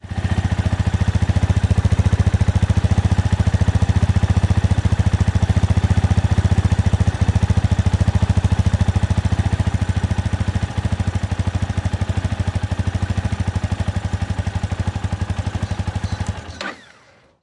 Exhaust of a Motorcycle Yamaha Tenere 250CC
250cc, bike, close, Enduro, engine, exhaust, Idle, motor, motorbike, motorcycle, sfx, transport